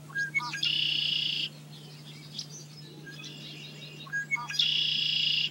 A redwing blackbird singing on farmland in central North America, near Chicago. Recorded with Sennheiser MKE 300 directional electret condenser mic on DV camcorder.